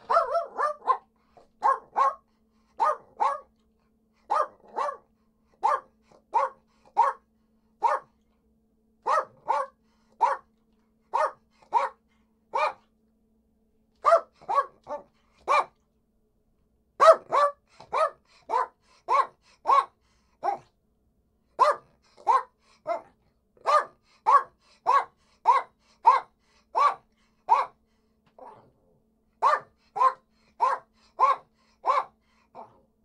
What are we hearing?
dog-barking
A dog barks inside a house.
indoors, poodle